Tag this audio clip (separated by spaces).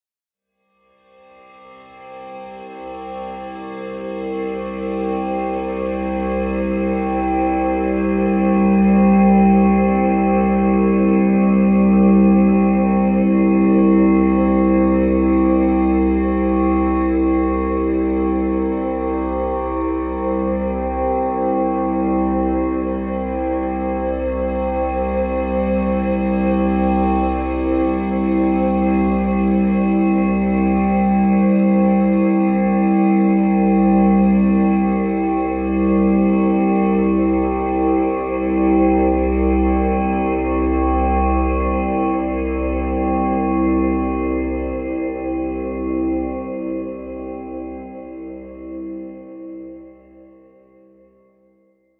drone ambient multisample atmosphere